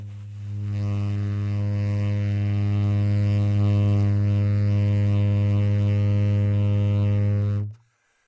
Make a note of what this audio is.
A long subtone concert G on the alto sax.
howie, sax, g, subtone, smith